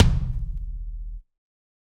Kick Of God Wet 023
drumset, kit, god, kick, set, pack, realistic, drum